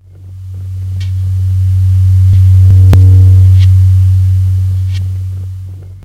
I tried to create a perfect sweep, but it was harder than I expected. There is an annoying little damage in the middle. A good exercise for a sound nerd to repair.